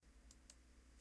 when you select something